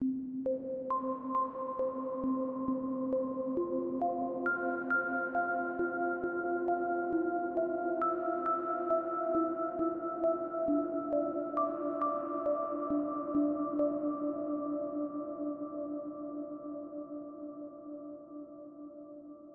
MH-Arp
ambient, arpeggio, loop, space, synth